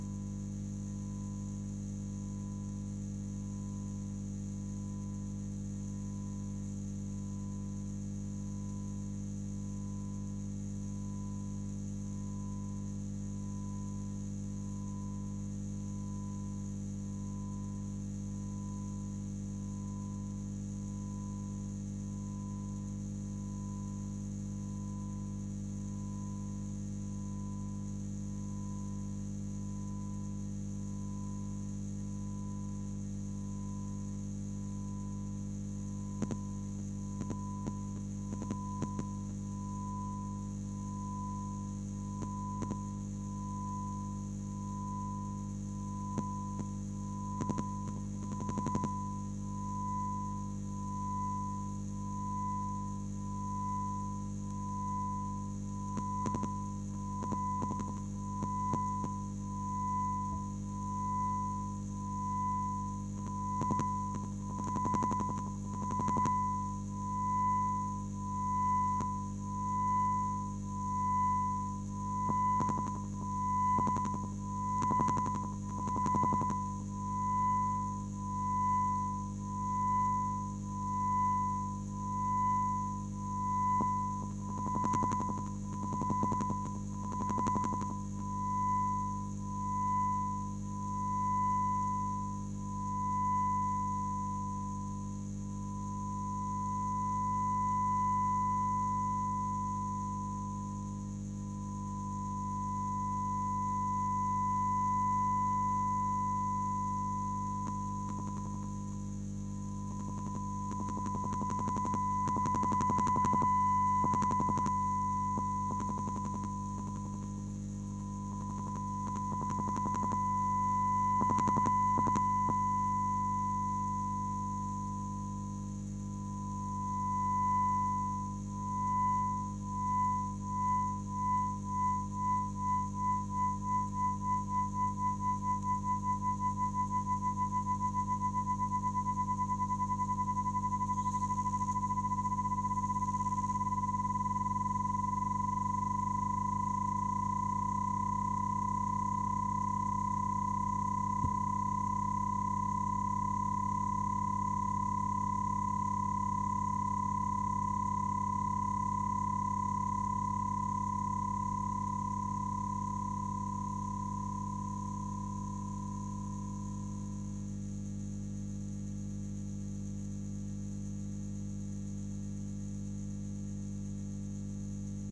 Message 1-3 are captured by NASA, but recently even other countries have registered very strong, but short signals. The receivers are Masers and the frequencies are in the higher GigaHz range. Message4 was from Russia.
masers, mystery, NASA, radio, Space, telescope